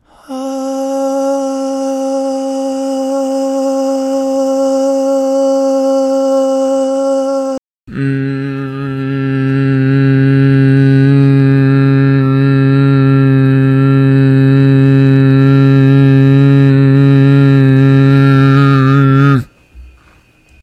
Two sustained voice samples I subsequently pitch shifted and layered for an ambient work (to achieve an 80's choir pad effect). The key is ALMOST in middle C, but slightly off...because I can't sing. The second, lower voice sample was recorded extremely close to the mic to achieve clipping; I wanted to get a buzzy, boomy sound out of it.